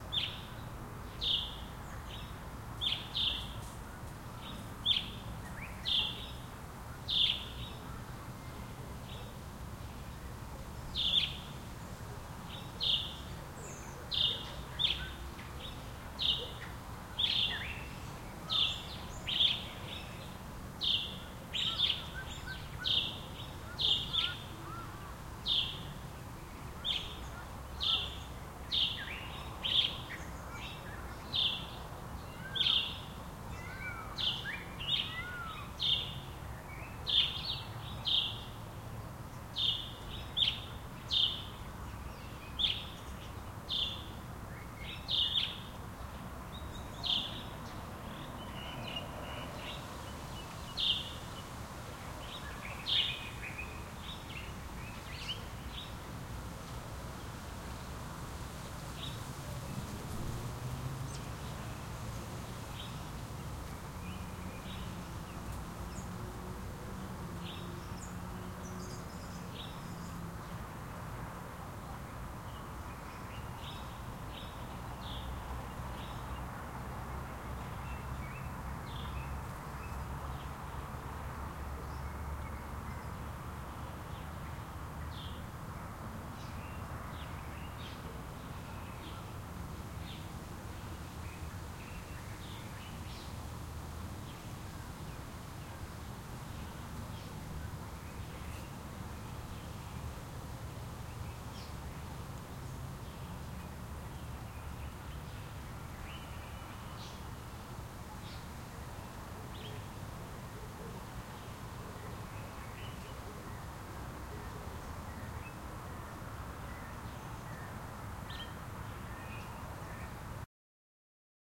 Southdowns estate ambiance
Ambiance from Southdowns estate: Calls of birds, hadada in the distance, peacock leaves rustling in wind, dogs. Recorded with a zoom H6 recorder/ microphone on stereo. Recorded in South Africa Centurion Southdowns estate. This was recorded for my college sound assignment. Many of my sounds involve nature.